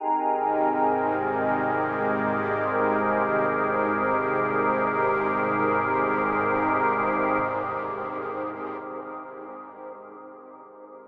A luscious pad/atmosphere perfect for use in soundtrack/scoring, chillwave, liquid funk, dnb, house/progressive, breakbeats, trance, rnb, indie, synthpop, electro, ambient, IDM, downtempo etc.
luscious, evolving, house, 130-bpm, atmosphere, ambience, pad, liquid, melodic, effects, progressive, wide, long, reverb, 130, dreamy, soundscape, morphing, expansive